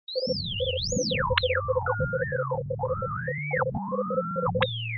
Space communication sound created with coagula using original bitmap image.